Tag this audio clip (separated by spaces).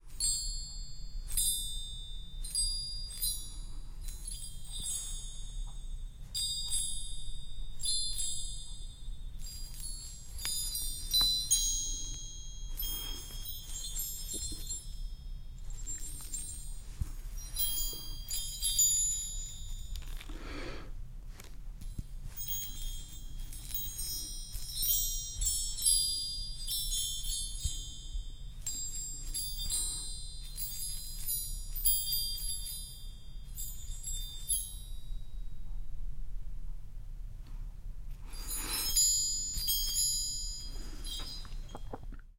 engelsrufer klangkugel